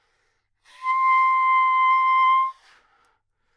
Sax Soprano - C6 - bad-richness bad-timbre
Part of the Good-sounds dataset of monophonic instrumental sounds.
instrument::sax_soprano
note::C
octave::6
midi note::72
good-sounds-id::5868
Intentionally played as an example of bad-richness bad-timbre
C6, good-sounds, multisample, neumann-U87, sax, single-note, soprano